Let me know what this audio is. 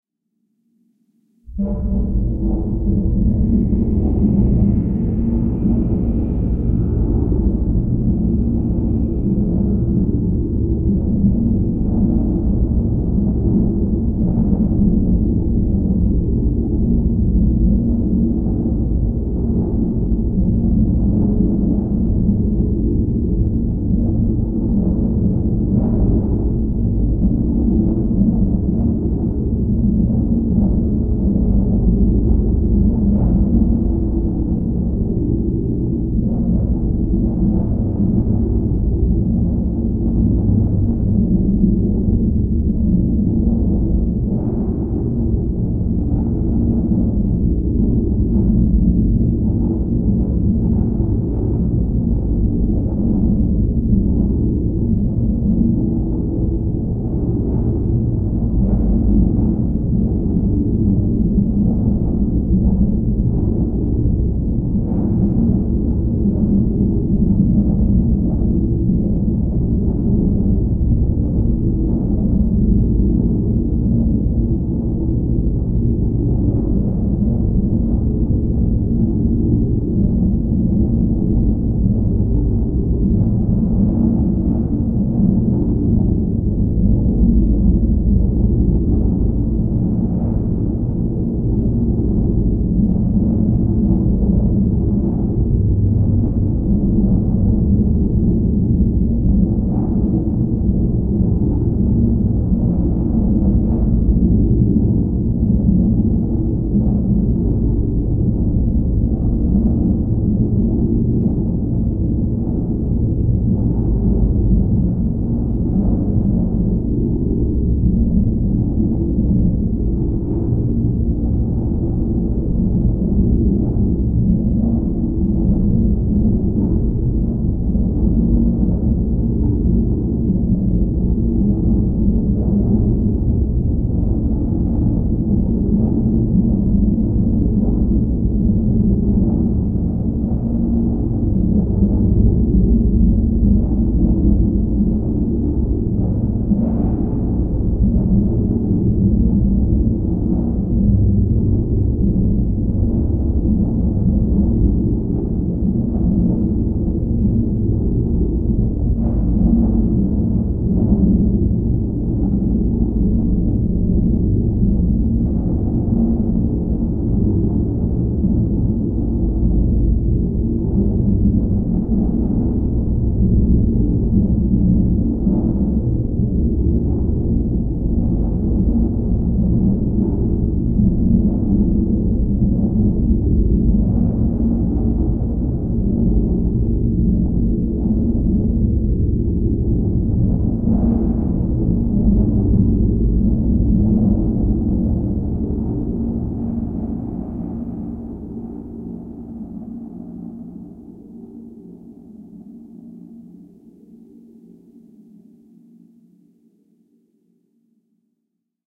LAYERS 017 - MOTORCYCLE DOOM 2-14
LAYERS 017 - MOTORCYCLE DOOM 2 builds further on LAYERS 017 - MOTORCYCLE DOOM. It is this sound mixed with a self created pad sound from the Discovery Pro VST synth with a Detroit like sound but this sound is processed quite heavily afterwards: first mutilation is done with NI Spectral Delay, then some reverb was added (Nomad Blue Verb), and finally some deformation processing was applied form Quad Frohmage. To Spice everything even further some convolution from REVerence was added. The result is a heavy lightly distorted pad sound with a drone like background. Sampled on every key of the keyboard and over 3 minutes long for each sample, so no looping is needed. Please note that the sample numbering for this package starts at number 2 and goes on till 129.